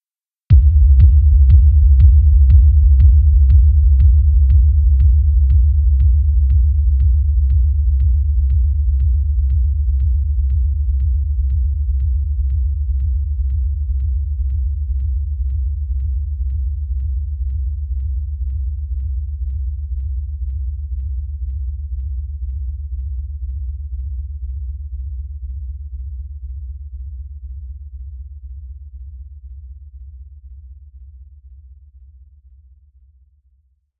A long-tailed impact bass drum sound effect
bass, boom, cinematic, dark, destroy, drum, evil, gigantic, huge, impact, preamp